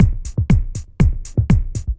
120 bpm loop